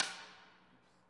I took my snare drum into the wonderfully echoey parking garage of my building to record the reverb. Included are samples recorded from varying distances and positions. Also included are dry versions, recorded in a living room and a super-dry elevator. When used in a production, try mixing in the heavily reverbed snares against the dry ones to fit your taste. Also the reverb snares work well mixed under even unrelated percussions to add a neat ambiance. The same goes for my "Stairwell Foot Stomps" sample set. Assisted by Matt McGowin.
garage, reverb, snare